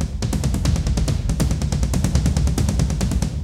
low tom loop
2 measures long at 140 bpm. A floor tom solo.